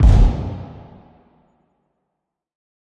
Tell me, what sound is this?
delphis Thunder-Inception 03
Made with my own fireworks recording 2008 in the backyard
inception, delphi, explosion, film, musical, bang, hits, thunder, firework, end